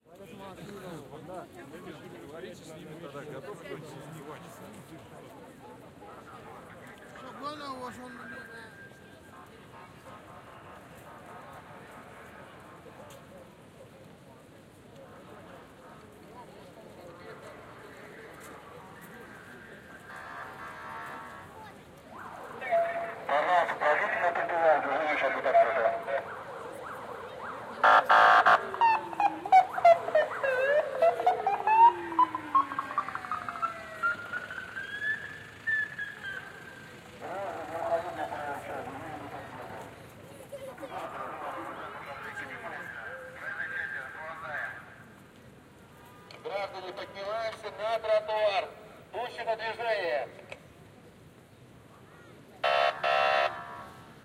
russian police
At the 9th of may in Saint Petersburg, Russia. Celebration of the End of World War Two. Polic is trying to keep people away from walking on the streets. This officer knows how to play its siren.